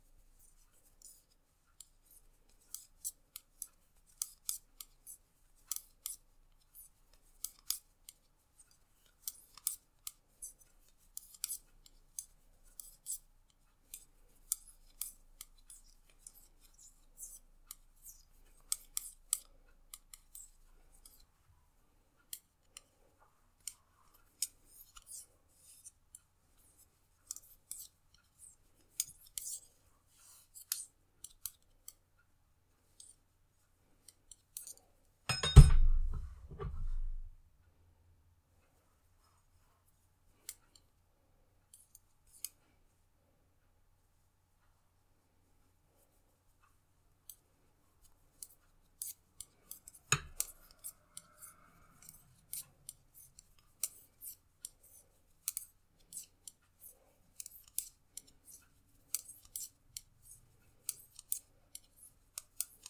knitting and dropping metal knitting needle
30 seconds of knitting with metal knitting needles, dropping a metal knitting needle and about 22 seconds of knitting afterward.
Metal-Knitting-Needles, Knitting, Dropping-Knitting-Needle